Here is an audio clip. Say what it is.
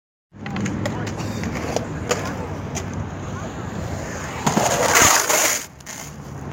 A fs powerslide done with a skateboard on polished concrete, emiting that classic scratchy delicious sound
field, Powerslide, skate, recording, skateboard, skateboarding